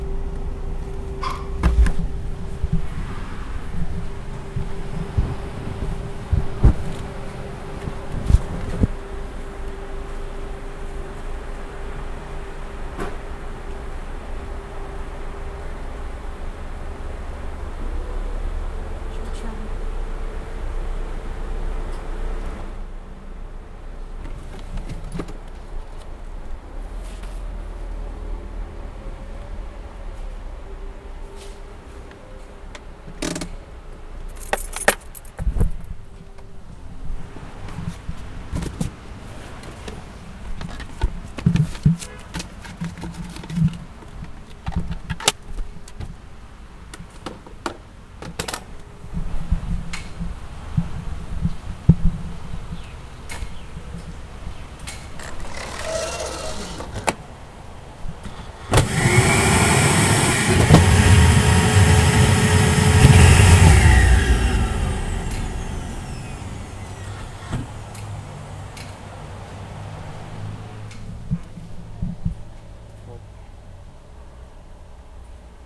Sending the pneumatic capsule through the tube to the teller at the bank.
drive-thru, suction, neumatic, tube, bank